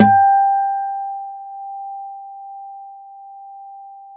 A 1-shot sample taken of harmonics of a Yamaha Eterna classical acoustic guitar, recorded with a CAD E100 microphone.
Notes for samples in this pack:
Harmonics were played at the 4th, 5th, 7th and 12th frets on each string of the instrument. Each position has 5 velocity layers per note.
Naming conventions for samples is as follows:
GtrClass-[fret position]f,[string number]s([MIDI note number])~v[velocity number 1-5]
The samples contain a crossfade-looped region at the end of each file. Just enable looping, set the sample player's sustain parameter to 0% and use the decay and/or release parameter to fade the sample out as needed.
Loop regions are as follows:
[150,000-199,999]:
GtClHrm-04f,4s(78)
GtClHrm-04f,5s(73)
GtClHrm-04f,6s(68)
GtClHrm-05f,3s(79)
GtClHrm-05f,4s(74)
GtClHrm-05f,5s(69)
GtClHrm-05f,6s(64)
GtClHrm-07f,3s(74)
GtClHrm-07f,4s(69)
GtClHrm-07f,5s(64)
GtClHrm-07f,6s(59)
GtClHrm-12f,4s(62)
GtClHrm-12f,5s(57)
GtClHrm-12f,6s(52)
[100,000-149,999]:
GtClHrm-04f,3s(83)
1-shot, acoustic, guitar, multisample